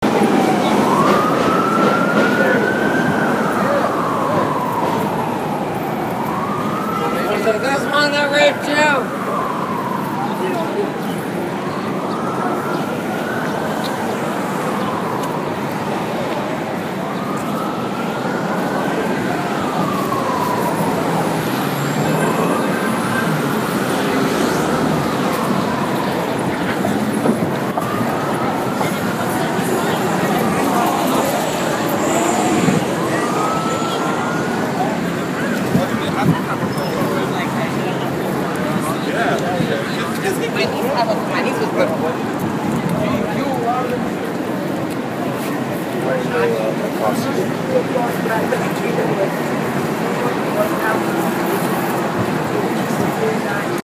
NYC 8th Ave
New York City 8th Avenue ambience, recorded with an iPhone 5S.
Recording date: August 2015
16, 48, 8th, ambience, ambient, ambulance, ave, bit, city, iphone, iphone-5s, khz, mono, new, ny, nyc, sirens, traffic, york